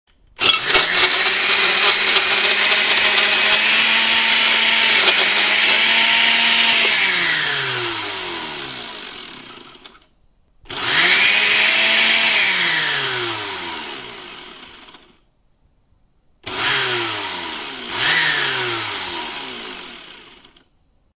blender ice crush
Sound of ice being crushed in my blender.